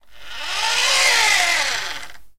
toy car rolling on floor.
Recorded in studio near the toy
car, toy, rolling